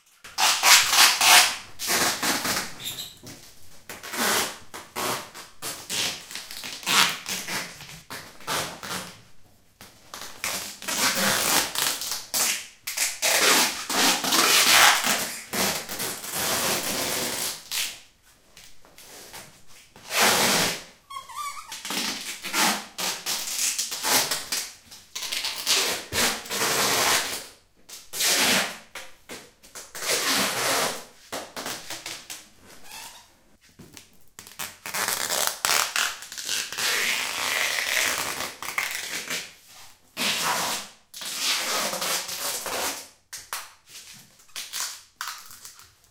Scotch Tape
Zoom H2 recording of packaging a back pack into cellophane bags.